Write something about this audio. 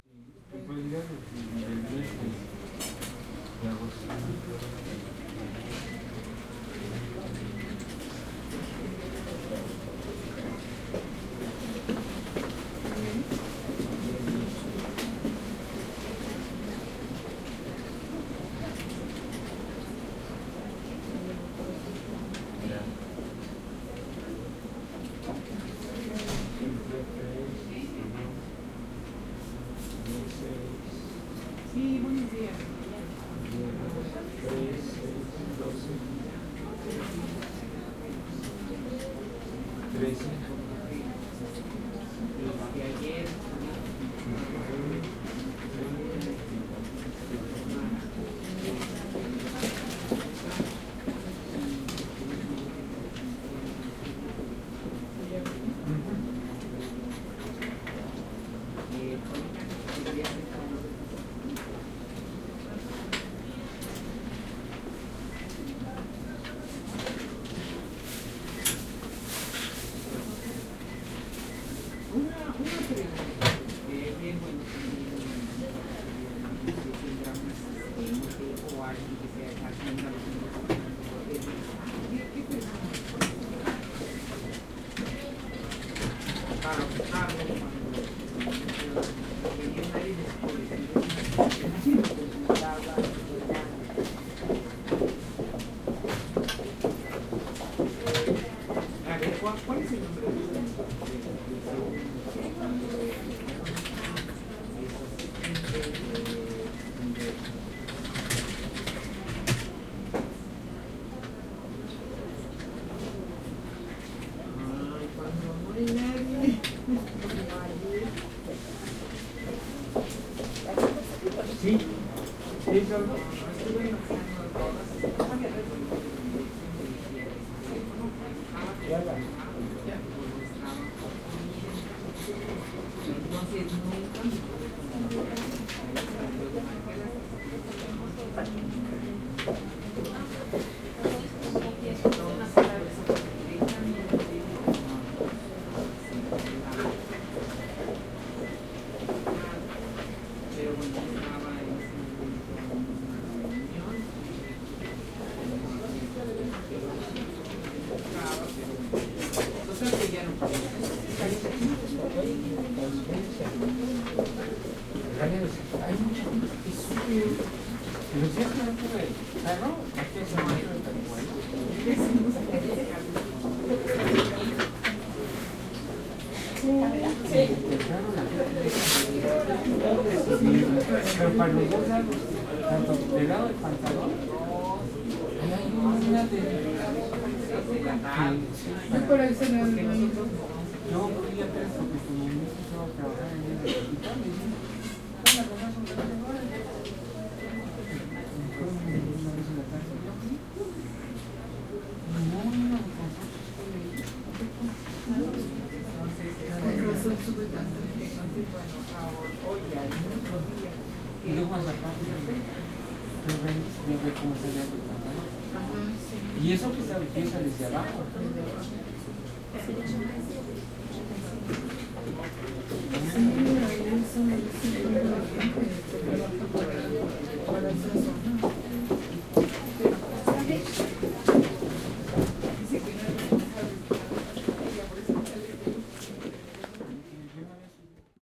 In a goverment office people work and walk around their desk. Recorded with zoom H2n in stereo.